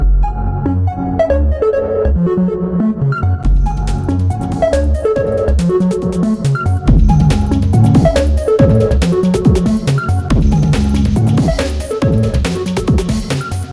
๐Ÿ…ต๐Ÿ† ๐Ÿ…ด๐Ÿ…ด๐Ÿ†‚๐Ÿ…พ๐Ÿ†„๐Ÿ…ฝ๐Ÿ…ณ.๐Ÿ…พ๐Ÿ† ๐Ÿ…ถ